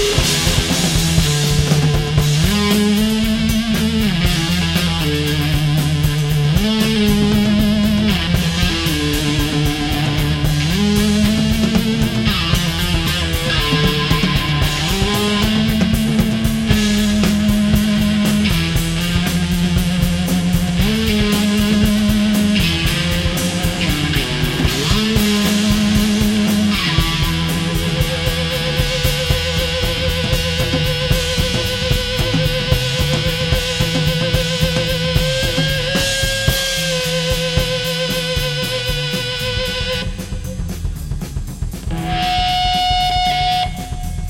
Sustain Guitar Jam no pick
one handed guitar sustain and feedback
Guitar, sustain